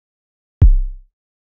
Kick Drum C0
Basic kick Drum
Basic, Drum, Kick, one, sample, shot